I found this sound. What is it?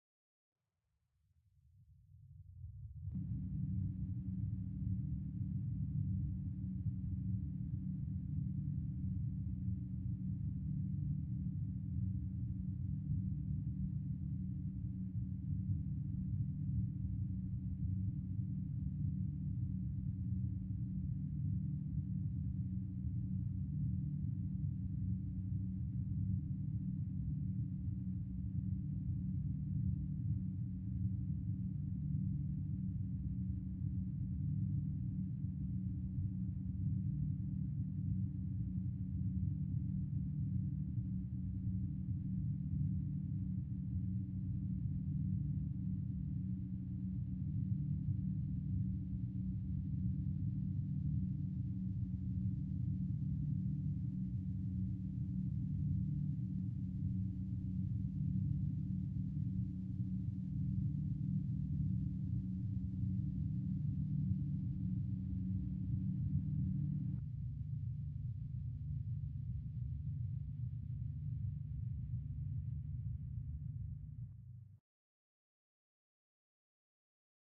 Drone Scifi hollow airy
this is part of a drone pack i am making specifically to upload onto free sound, the drones in this pack will be ominous in nature, hope you guys enjoy and dont forget to rate so i know what to make more of
hollow, drone, sci-fi, airy